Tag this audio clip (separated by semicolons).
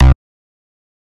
bass; lead; nord; synth